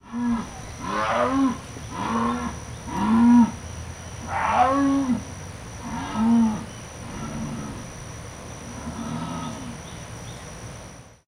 Recording of a single Jaguar roaring, with crickets in the background. Recorded with a Zoom H2.
cricket, field-recording, growl, jaguar, roaring, zoo